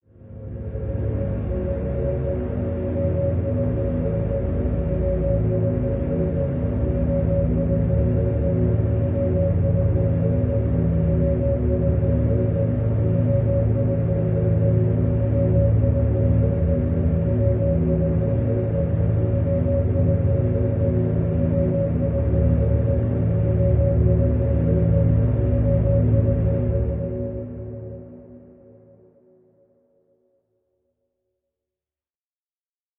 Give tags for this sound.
scary; wind; haunted; windy; horror; background; creepy; ambiance; ambience

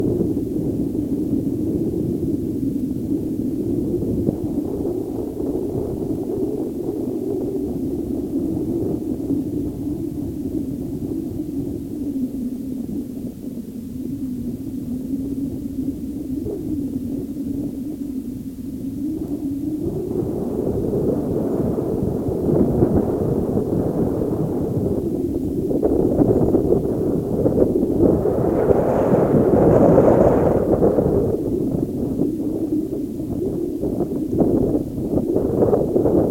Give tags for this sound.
bronze; contact; contact-mic; contact-microphone; Denver; DYN-E-SET; field-recording; mic; normalized; PCM-D50; Phimister; Schertler; sculpture; Sony; wikiGong

